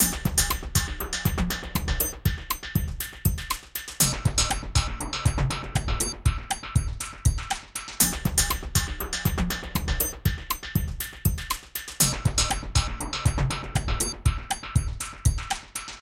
Action Percussion Metallic 1 (120 BPM)
Metallic Percussion for action or dramatic Films. 120 BPM.